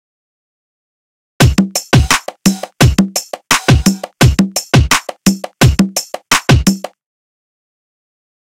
halfstep171bpm
I made this beat in Ableton Live. It's half-step drum and bass.